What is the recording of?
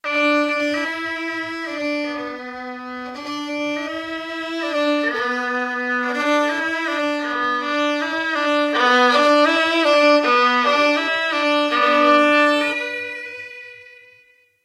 fiddle melody 04
yet more fiddle
field-recording, fiddle, melody